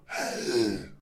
Damaged 3 - The Ridge - Host
Part of a screaming mutant I made for a student-game from 2017 called The Ridge.
Inspired by the normal zombies in Left 4 Dead.
Recorded with Audacity, my voice, friends and too much free-time.
monster, scary, thrill, horror, alien, creepy, bioshock, left4dead, mutation, criminal, sci-fi, fear, screaming, crazy, mutated, zombie, mutant, attack, terror